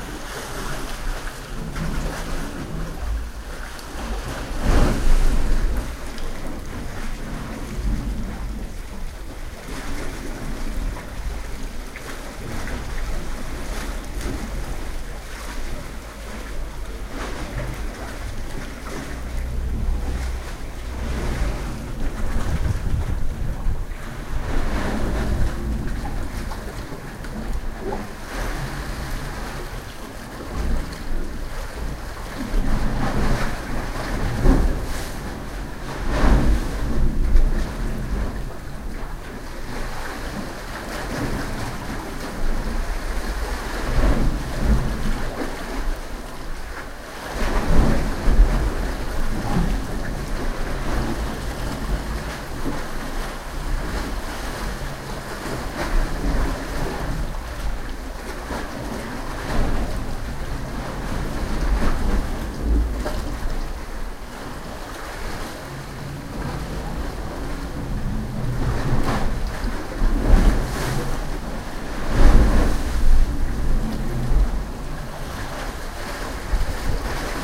Waves in a cave in Algarve, Portugal, coming in from the atlantic ocean and breaking on the rocks inside the cave, giving an echoing bass sound. Sony Dat-recorder. Vivanco EM35.